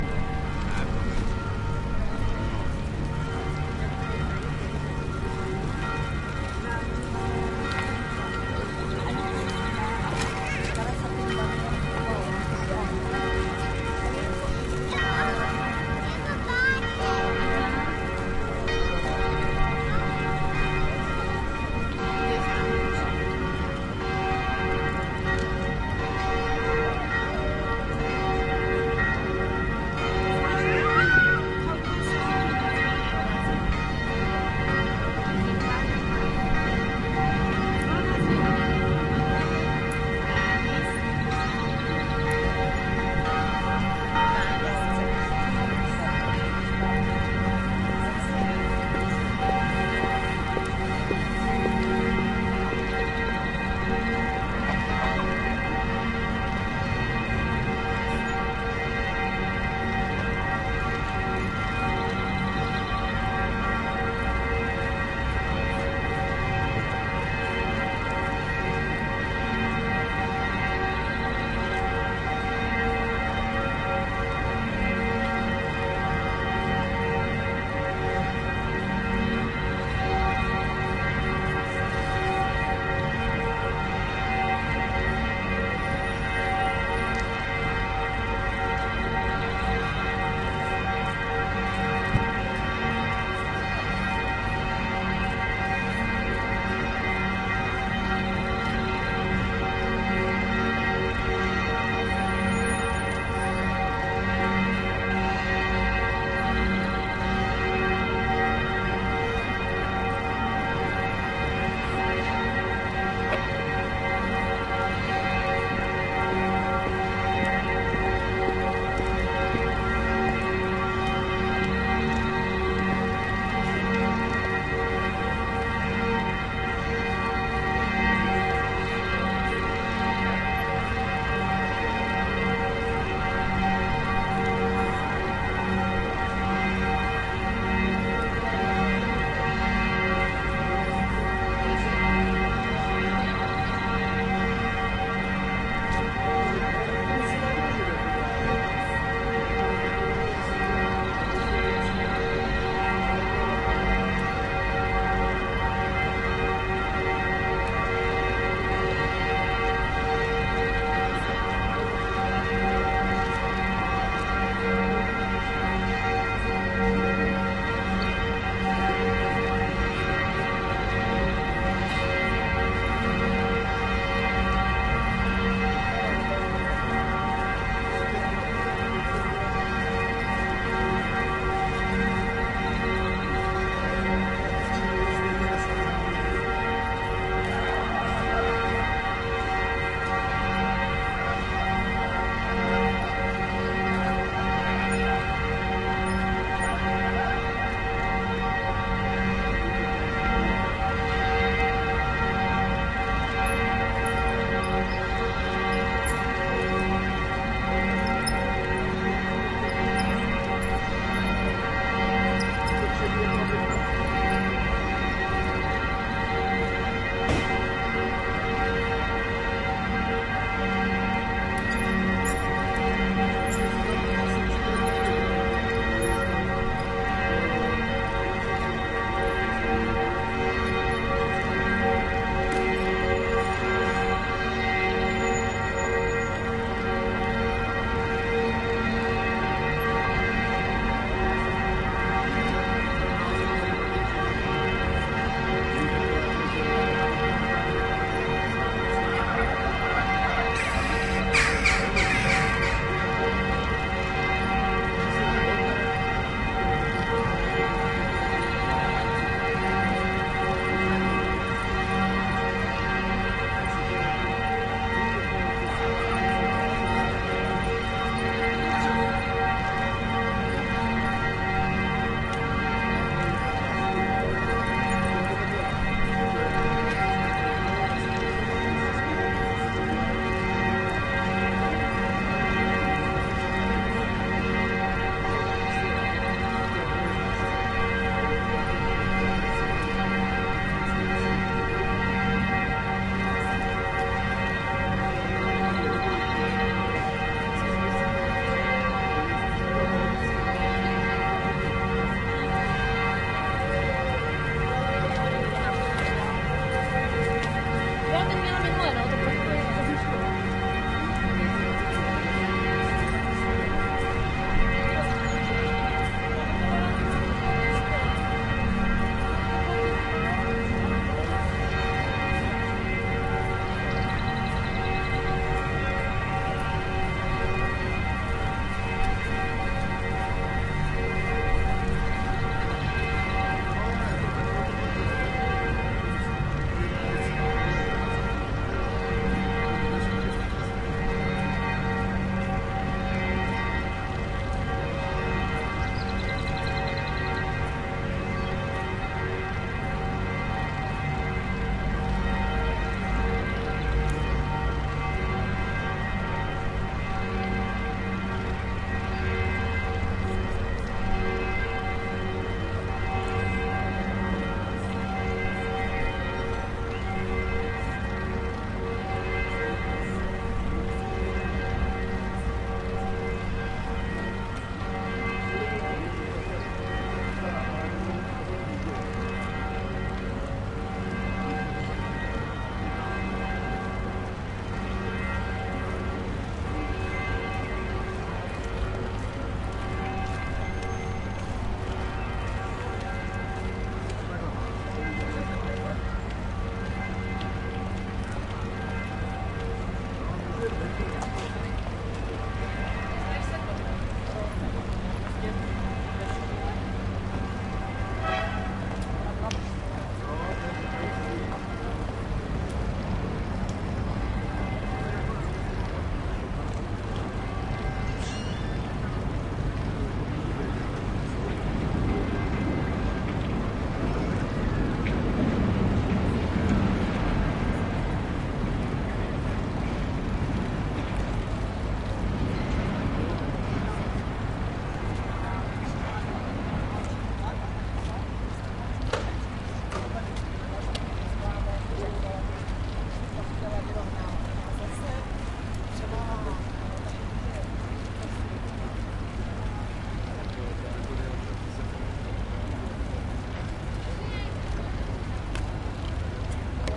This captures the sound of the midday bells in the context of the walkway in front of the church, including people walking and talking, traffic, birds, and so on.